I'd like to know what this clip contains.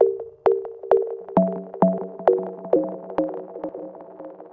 bleeps and bloops made with reaktor and ableton live, many variatons, to be used in motion pictures or deep experimental music.